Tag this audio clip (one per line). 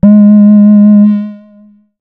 basic-waveform
multisample
reaktor
triangle